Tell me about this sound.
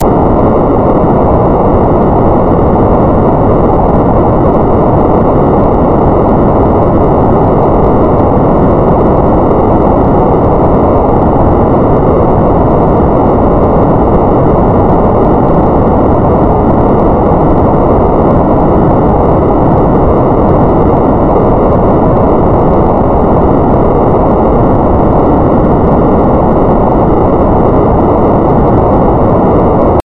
21 LFNoise2 1600Hz
This kind of noise generates sinusoidally interpolated random values at a certain frequency. In this example the frequency is 1600Hz.The algorithm for this noise was created two years ago by myself in C++, as an imitation of noise generators in SuperCollider 2.